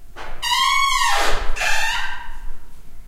Series of squeaky doors. Some in a big room, some in a smaller room. Some are a bit hissy, sorry.